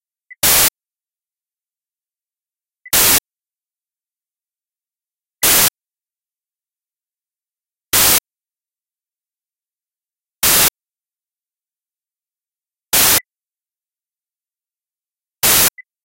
pag opacity 1
Sound opacity (second example on sound transparency and opacity)
This sound sample is a companion to my talk and paper presented at FOTEO ("Faire oeuvre, transparence et opacité") at Université Laval, Visual Art Department, May 2008. The paper title is: "Transparence sonore : acoustique physique; opacité sonore : un phénomène psychoacoustique; implications en création sonore".
This example illustrates the "sound opacity" associated with psychoacoustic masking. A target (small tone) is presented with a mask (noise). Depending on the temporal position of the target in relation with the mask, the target is masked or not. In this example, the target is presented first, then closer to the mask, then with the mask, and finally after the mask. Masking, is what I presented as sound opacity in my talk.
sound-opacity, philippe-aubert, gauthier